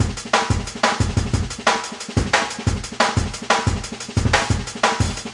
Breakbeat 180bpm
A breakbeat/drum and bass beat made in FL Studio 10 using XLN Addictive drums. 24/10/14. Uncompressed.
breakbeat, bass, 180bpm, drum, 180, bpm, percussion